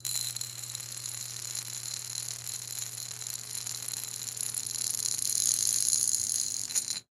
ring around metallic shower hose 1
ring metal sound
metal, ring, sound